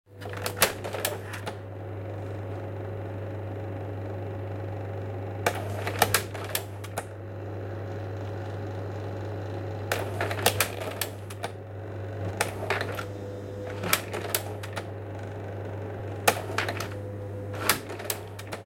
slideshow projector noisy fan last two slides sticky
80s slide projector with a noisy fan.
The three first slides go smoothly, the two last are stuck for a bit.
slide, dias, slideshow, dia, fan-noise, projector